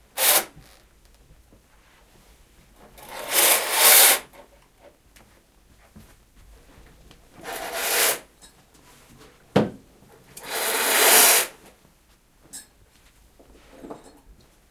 Old curtains being moved on metal curtain rail - three short movements
The sound of curtains on a metal curtain rail being moved, creating a loud and slightly-jarring scratching noise.
Similar sounds available in the Curtains pack.
Recorded with a Zoom iQ7.
curtain-rail, curtains, metal, metallic, old, scratch, slide, windows